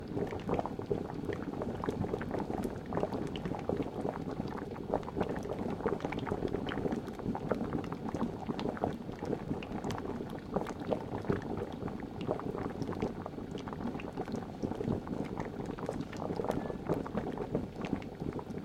Boiling Soup
A delicious red Thai noodle soup with veggies and tofu
soup, bubbles, cooking, kitchen, hot, boiling, pot